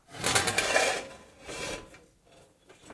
Metal object slide
hiss, cloth, metal, fabric, swish, object, slide